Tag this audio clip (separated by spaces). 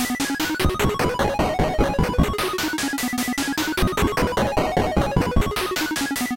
8-bit oldtime video music sounds synth console loops games nintendo game old sega